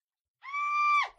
Scream of people
666moviescream, crits, dark, fear, horror, pain, saw, scream